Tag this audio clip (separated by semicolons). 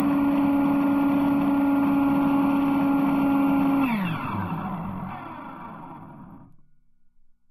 Astbury; Factory; Machine; Machinery; machine-shutdown; Mechanical; power-down; Sci-Fi; shutdown; Strange; Synthetic; turnoff